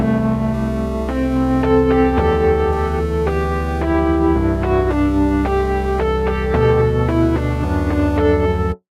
ElektroVII-EXP
Free virtual synth plug-in TAL-ElektroVII. Own melody.